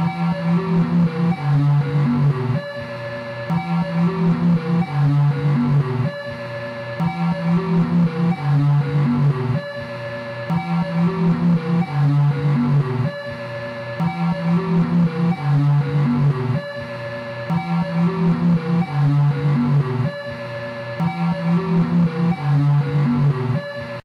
sound one
Short loop from a .99 keyboard I found at the thrift store.